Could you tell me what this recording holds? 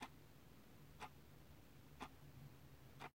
The tick tock of a watch
seconds, watch, time